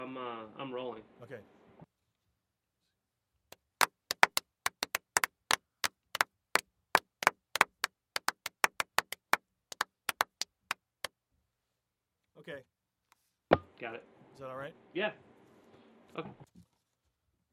Two people clapping out of sync. Medium to fast pace, slightly upbeat.